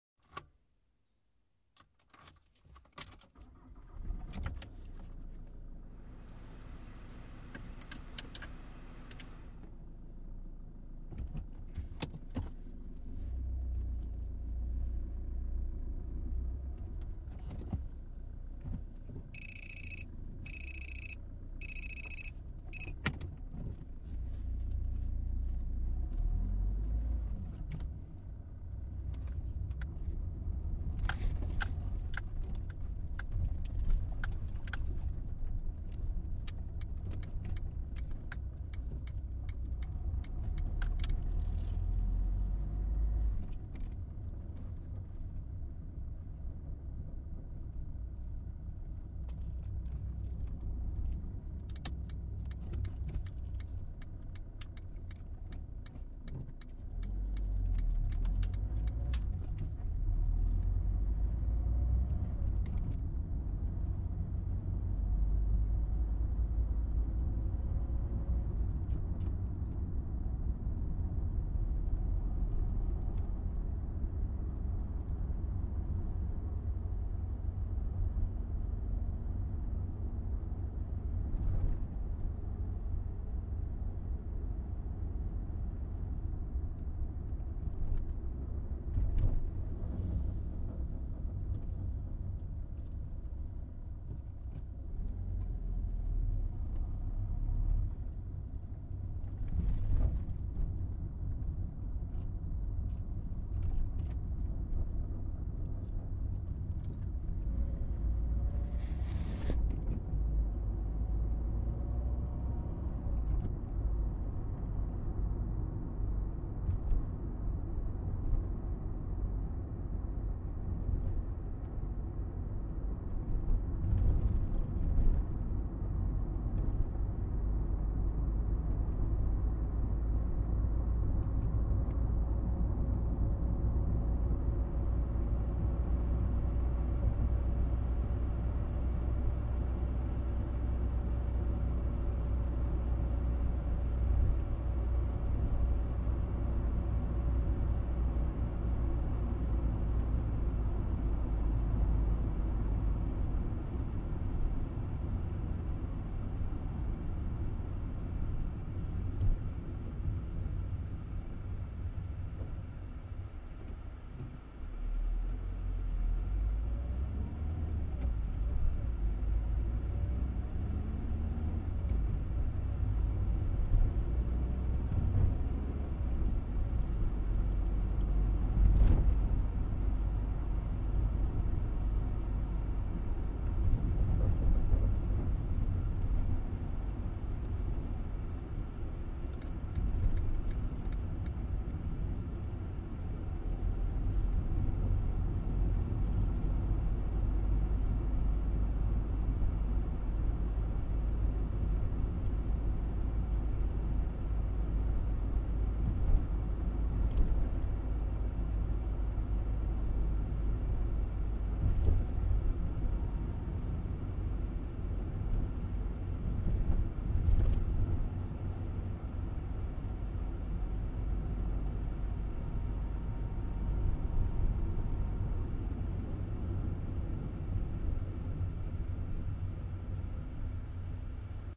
CAR, INTERIOR, INSIDE, KEY, IGNITION, DRIVING Stereo atmos atmosphere wildtrack ambience
Interior of car from turning key in ignition, engine start (diesel medium-sized car) and driving off. Includes indicator and sound of gears.
ambience, atmos, atmosphere, car, drive, driving, engine, gears, ignition, indicator, interior, motorist, road, wildtrack